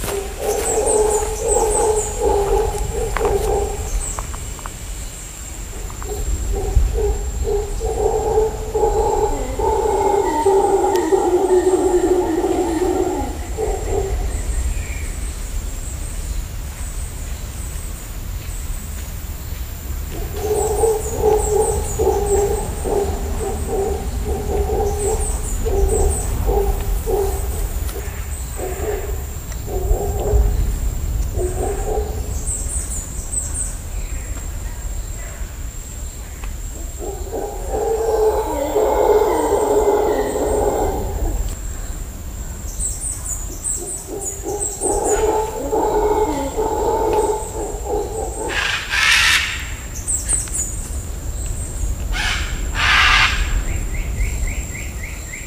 Howler Monkeys recorded in the Osa Peninsula of Costa Rica, December 2015. Recorded with an iPhone. Other animals audible.